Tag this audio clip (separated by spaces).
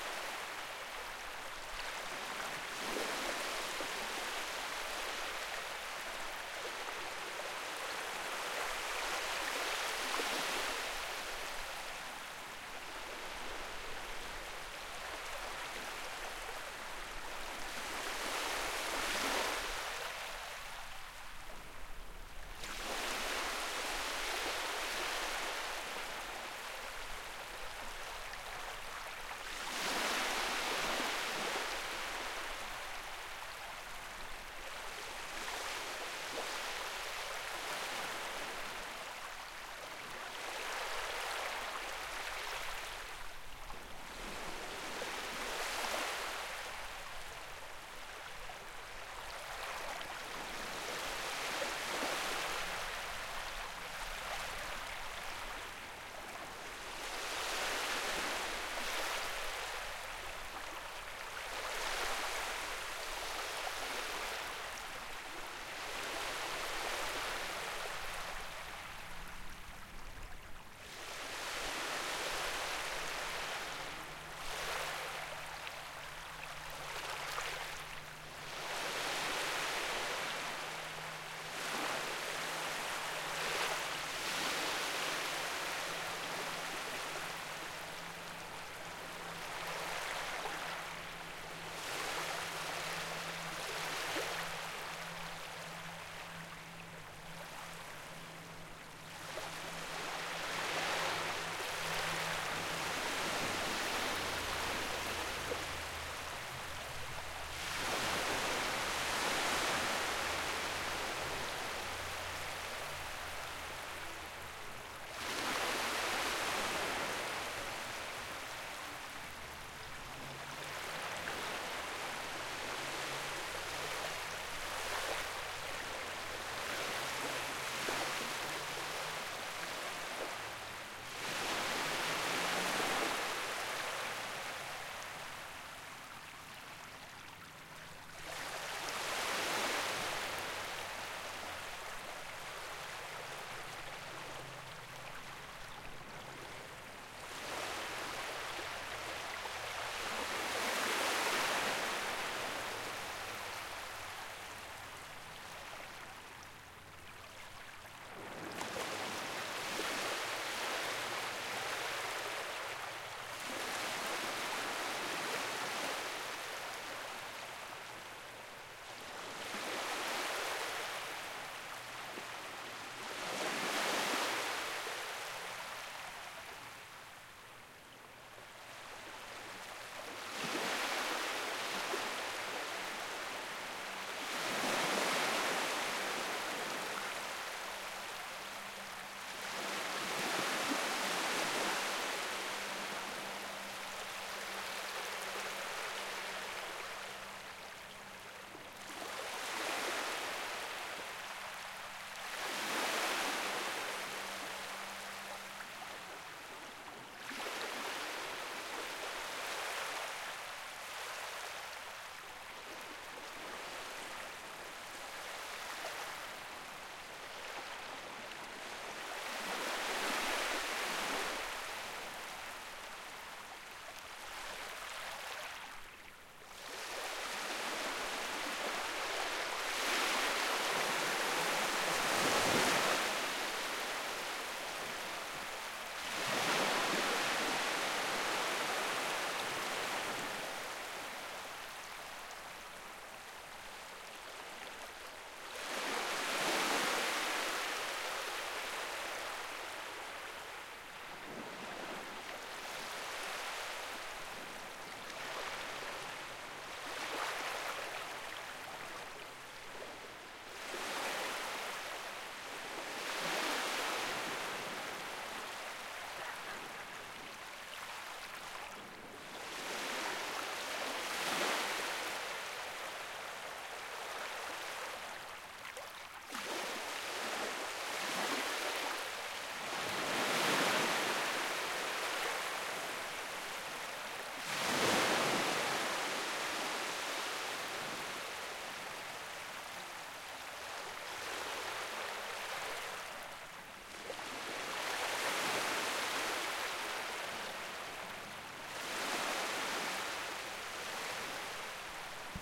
beach field-recording sea shore water waves